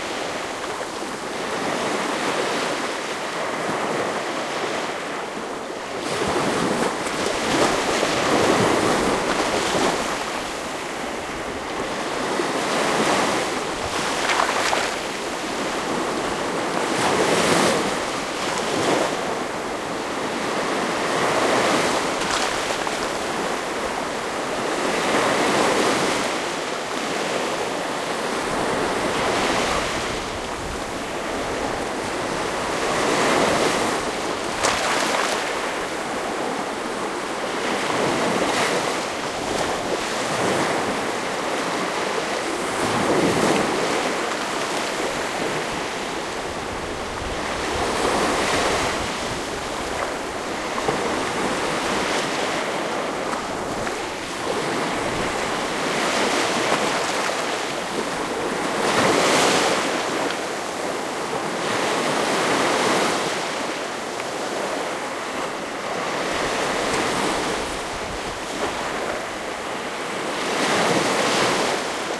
4ch-surround, close angle field recording of the seaside at Warnemünde on the German Baltic Riviera.
Recording was conducted in October 2013 directly on the shoreline, approx. 2m into and 50cm above the surf.
Recorded with a Zoom H2, these are the REAR channels, mics set to 120° dispersion.
ambient, baltic, beach, close-angle, field-recording, nature, noisy, quiet, splash, water, waves